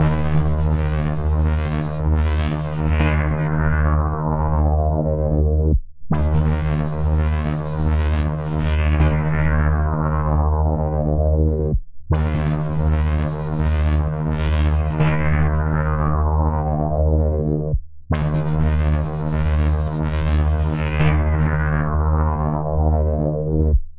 80bpmuncutloop8barfilterfun
Testing a synth and new filter.
Loop, Electro, Chill, Synth, Electronica, 80bpm, Filter, Downtempo